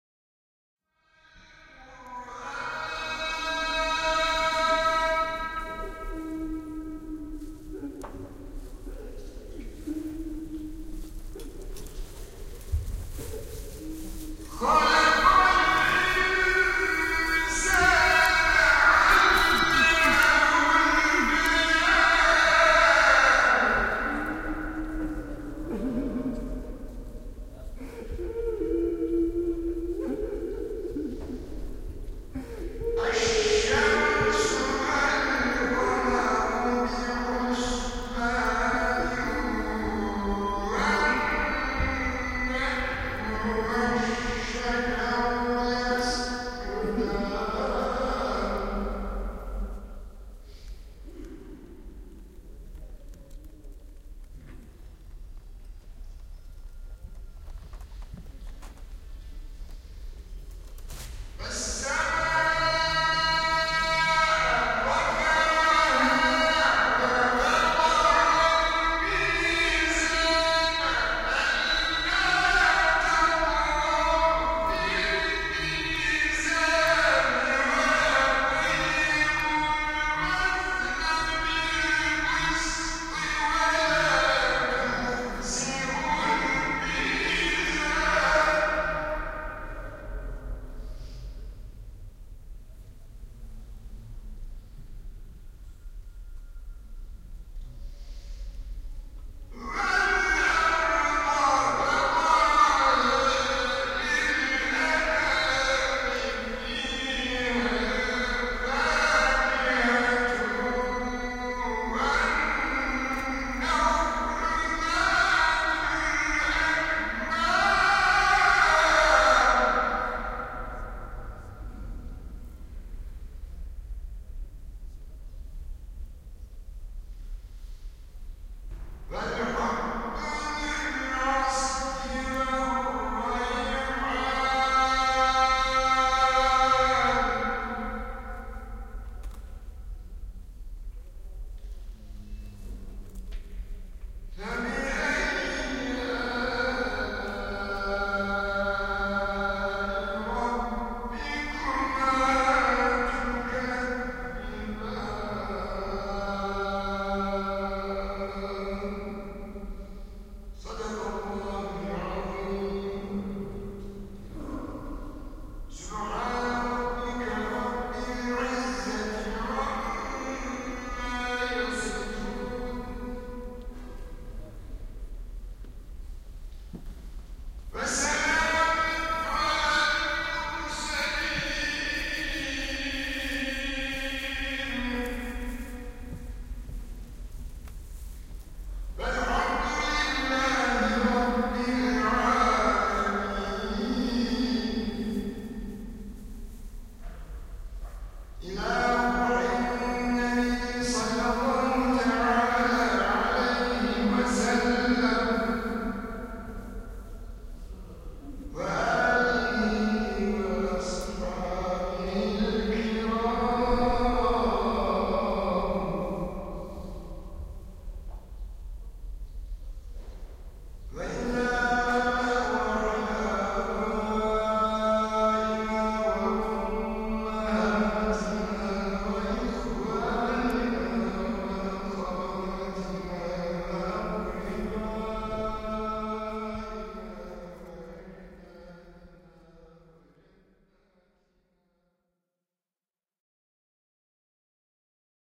21 man praying ( Istanbul )
Istanbul recording of a prayer . There is a man close to me it was crying . Very emotional recording. It was done with dat sony pocket one and home made binaural microphones.
cry, field, istanbul, man, prayer, recording, temple